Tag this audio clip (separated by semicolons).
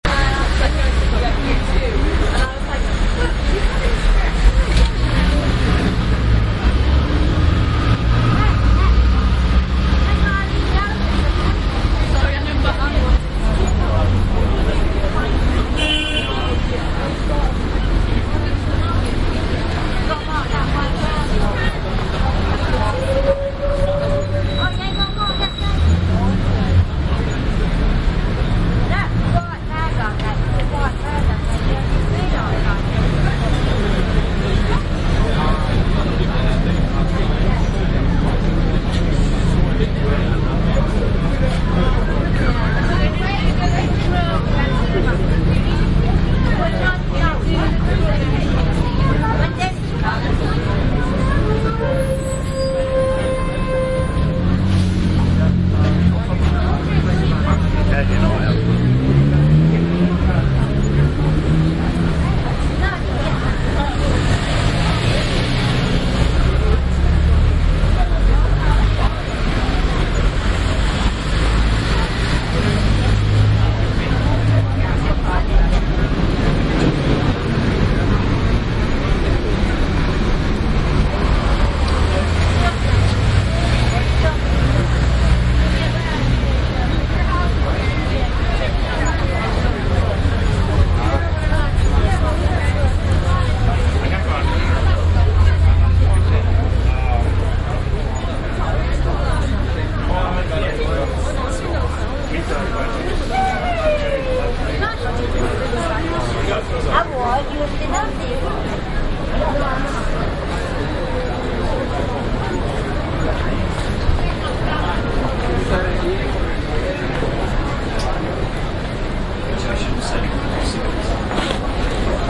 ambiance; ambience; atmosphere; general-noise; london